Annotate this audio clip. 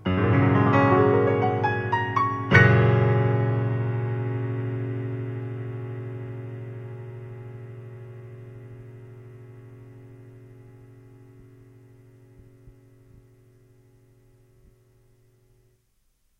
Another tasty piano ending. Played on a Yamaha Clavinova. Please download and enjoy.